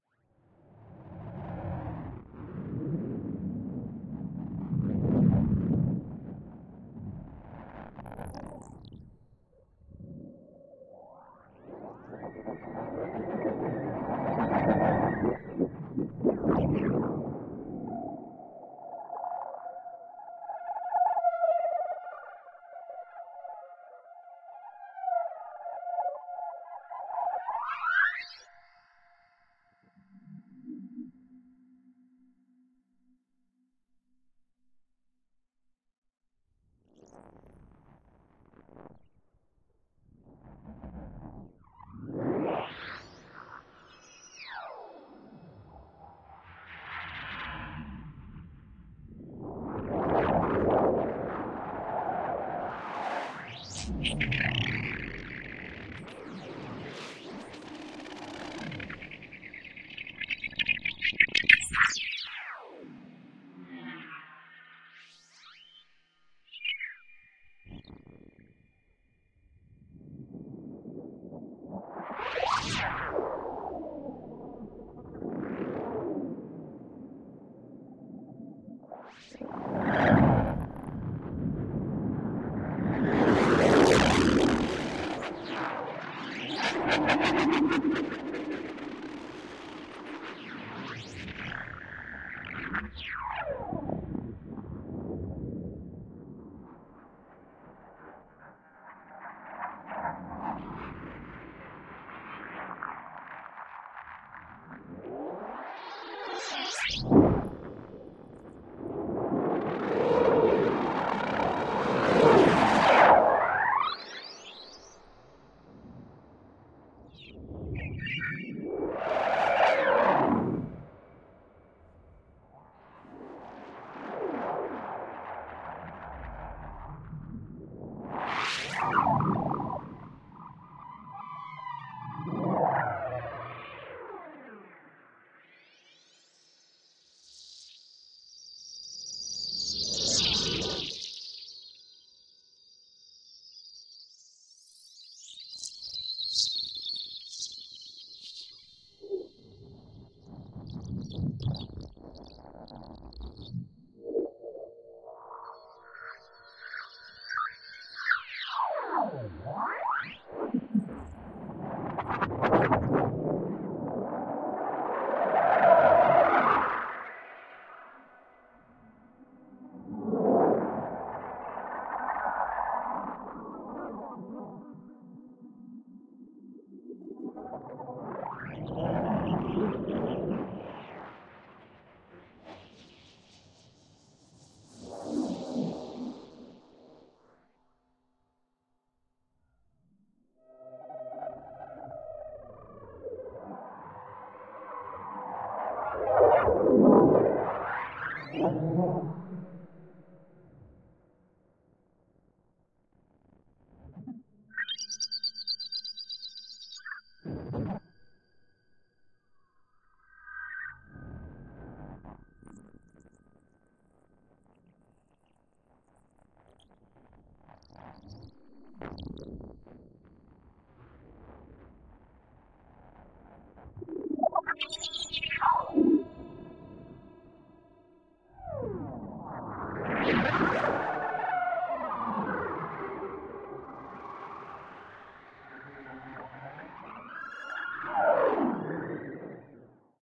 16.This sample is part of the "ESERBEZE Granular scape pack 3" sample pack. 4 minutes of weird granular space ambiance. Cosmic radio interference.